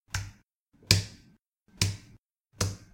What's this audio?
dh key collection
A couple of key presses and enter keys on my Apple Magic Keyboard 2.
type, keyboard, laptop, keystroke, computer, key, office, keys, typing